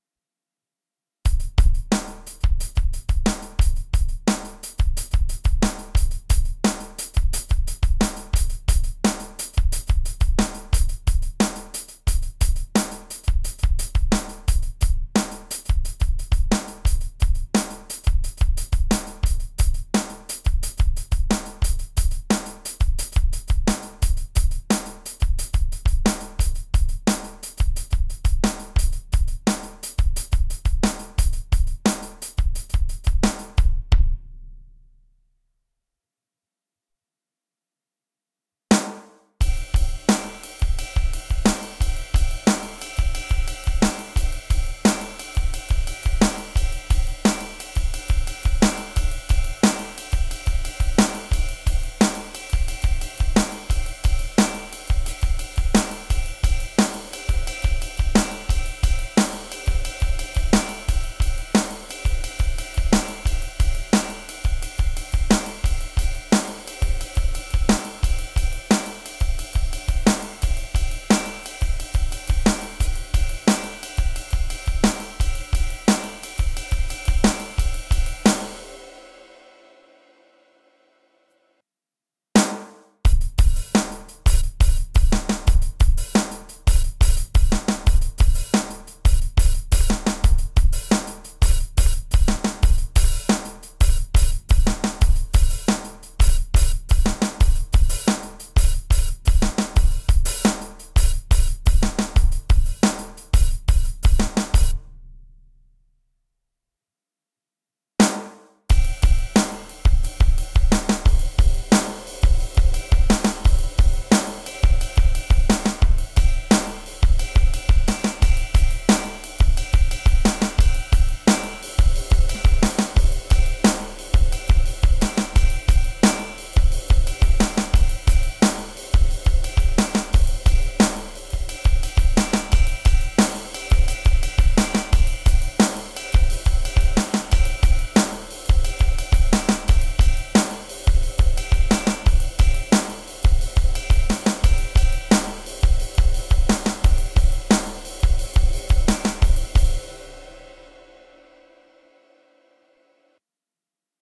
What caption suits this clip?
Rock - Funk drum loops @ 88 bpm
Drum loop in funk-rock style, 4/4 signature with a cicle of 7 on 8
drum, funk, loop